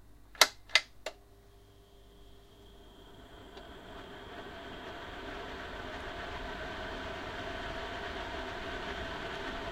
Extractor fan turn on
Wall mounted extractor fan being turned on by pull chord.